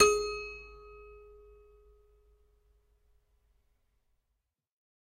multisample pack of a collection piano toy from the 50's (MICHELSONNE)